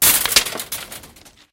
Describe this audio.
Kicking metal fence
a kick against a sloppy built metal fence